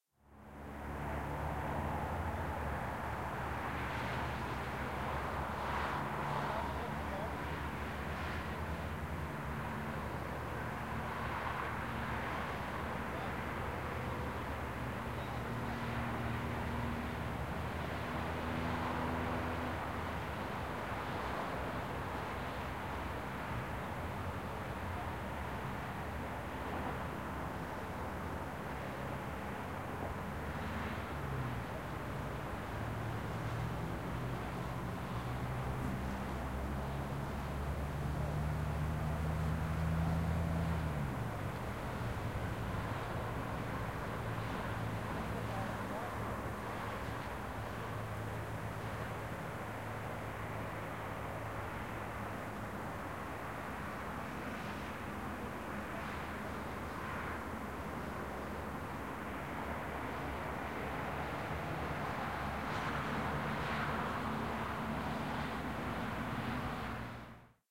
Quiet night city atmosphere
ambiance, ambience, atmosphere, soundscape